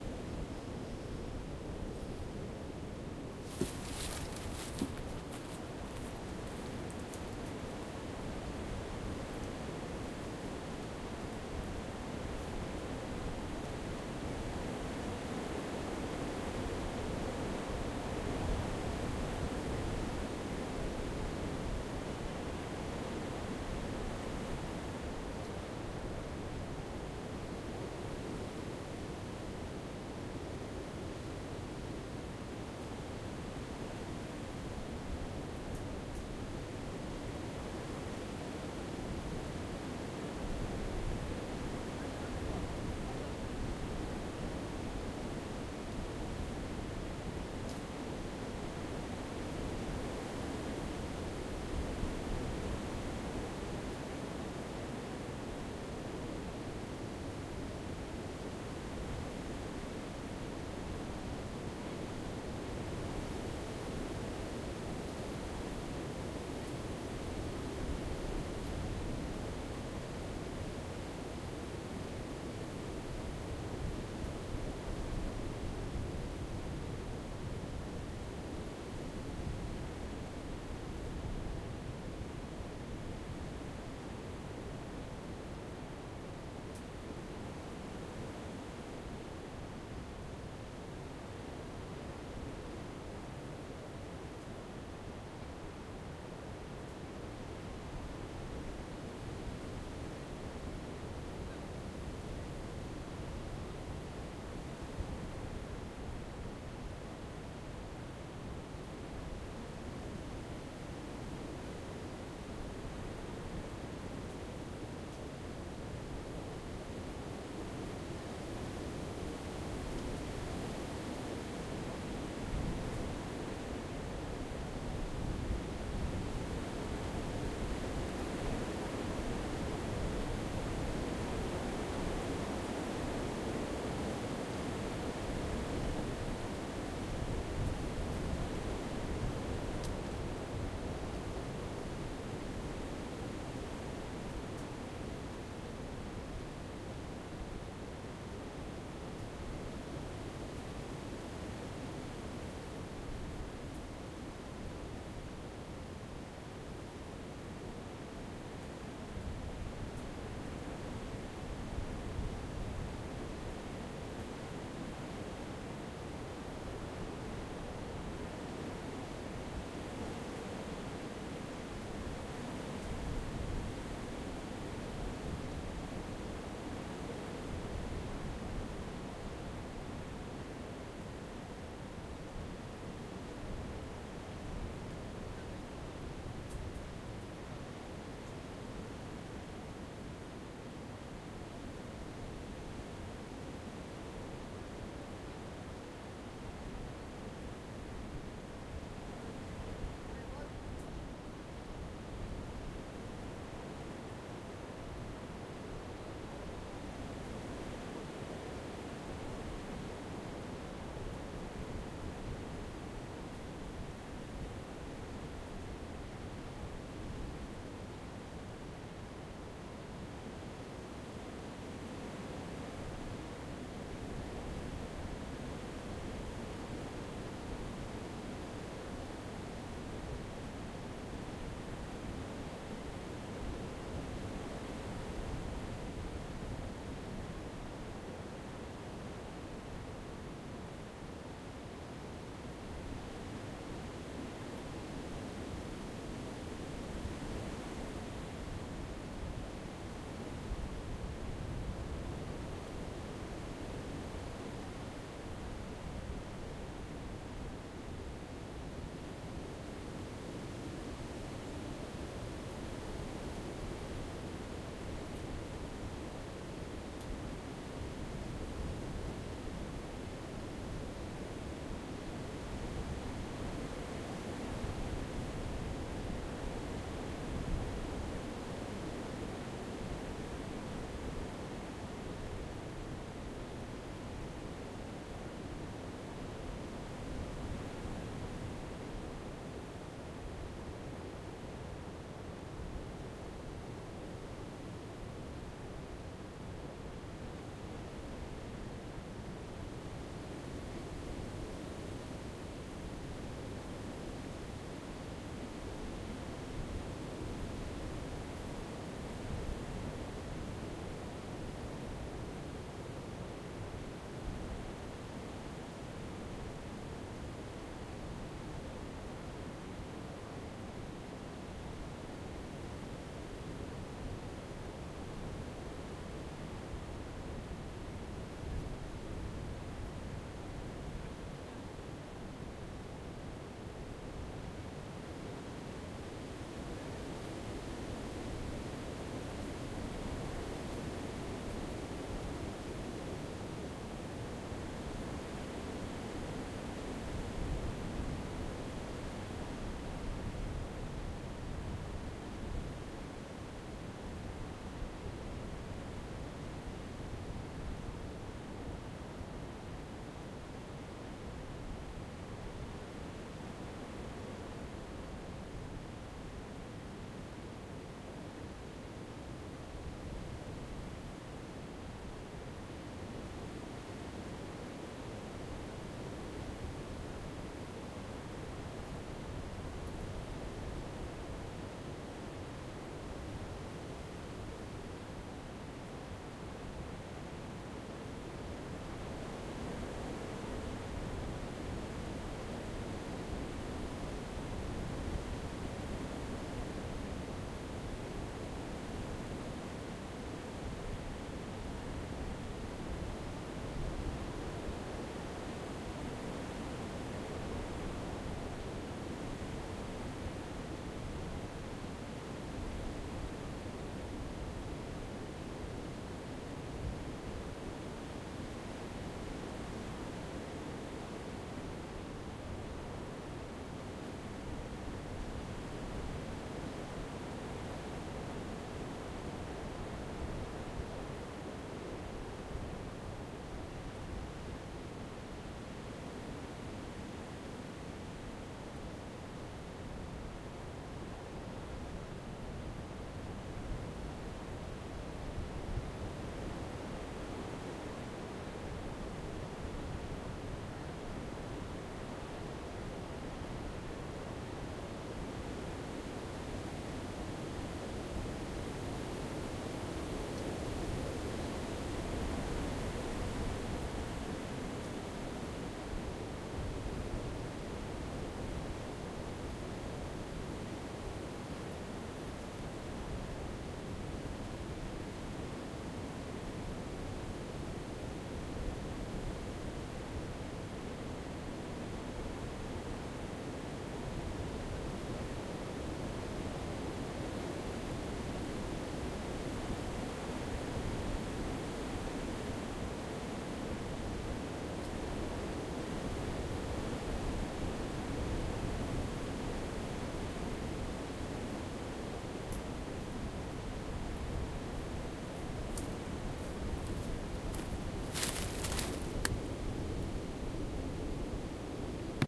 strong wind in the forest front
forest, wind, day, windy